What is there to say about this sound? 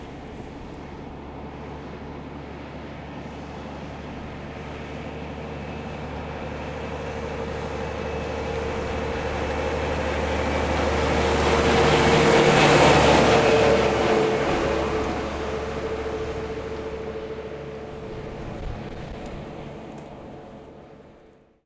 Truck-Uphill
Truck driving uphill.
Stereo recorded with Zoom H6.
Edited with Audacity.
I would love to hear your feedback and critique.
Countryside, engine, Passing, Road, Transport, Truck